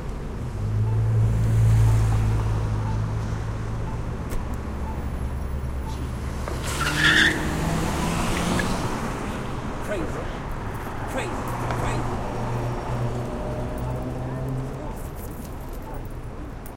City Crossing with Wheel Squeaks & Man saying "Crazy"
car, man, new-york, nyc, crazy, skid, city, field-recording